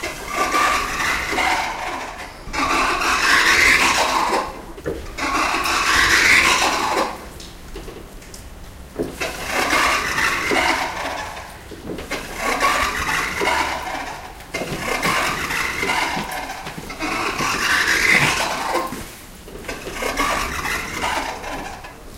I recorded these automatic squeaky doors in one Slovak hotel. It sounds really strange and without description you will probably newer guess what it is. I recorded this during normal day, so you can hear the hotel background noise. Recorded with Zoom H1.